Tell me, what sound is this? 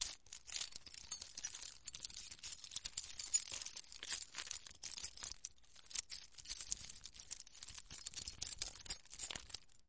keys - rustling 04
clink, jingle, keys, metal, metallic, rustle, rustling